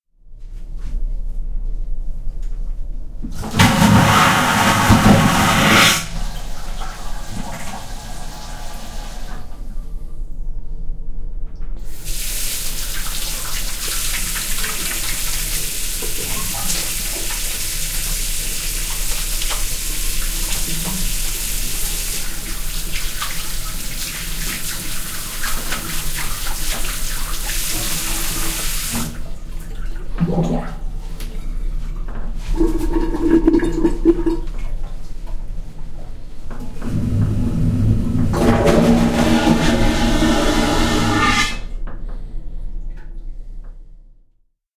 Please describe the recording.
The various sounds of the plumbing in the bathroom of a cruise liner in heavy weather crossing the Bay of Biscay.